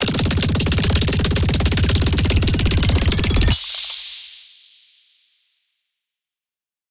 Future Machinegun
A synthesized sound that can be used for a future/alien weapon, with final
overheating included.
shot, snails, weird, sci-fi, digital, weapon, future, strange, laser, gun, sound-design, alien, abstract